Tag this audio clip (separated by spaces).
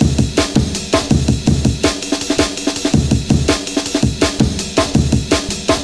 drums jungle drum-and-bass drum loop drum-loop